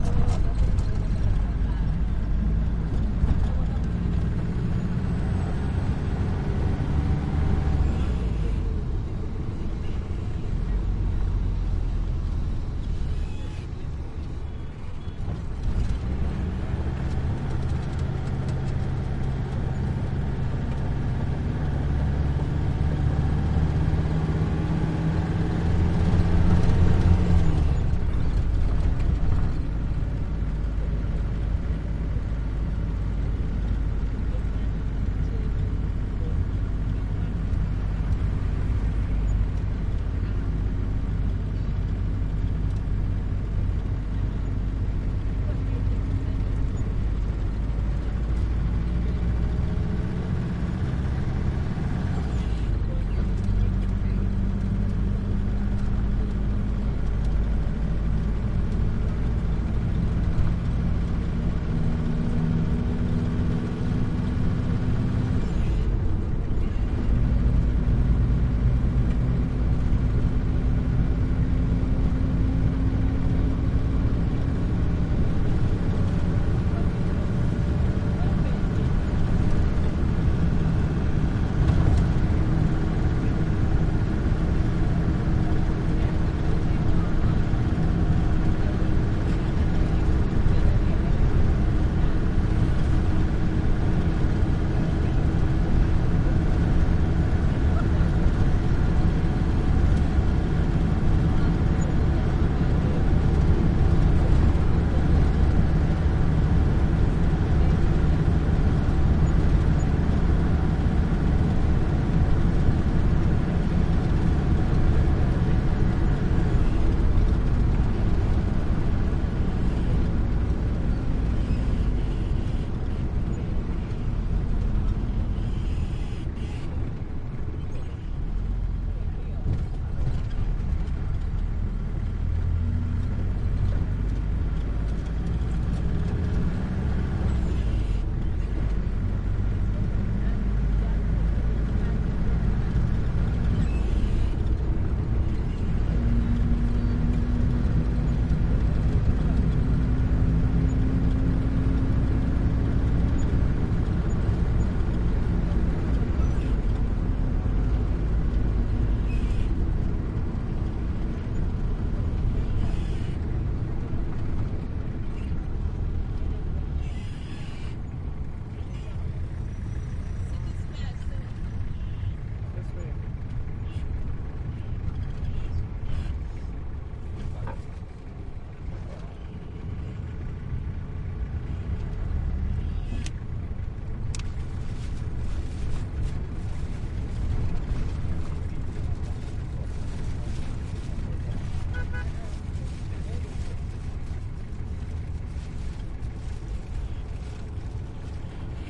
communal taxi van int throaty rattly accelerate decelerate Kampala, Uganda, Africa 2016
Uganda, taxi, Africa, decelerate, int, rattly, accelerate, van